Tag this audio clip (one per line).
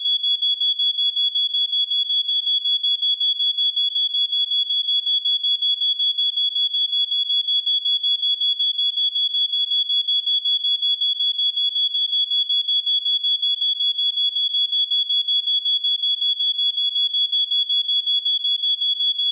alarm
alert
emergency
siren
warning